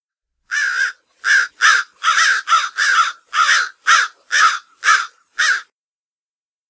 U.S. National Park Service - American Crow
This black bird is related to the raven, magpie, and the jays. Its wingspan is often over 3 ft. long, and it's usually seen in flight. The crow (Corvus brachyrhynchos) is one of the most intelligent and adaptable birds. Its diet consists of small animals, eggs, carrion (dead animals), and even garbage!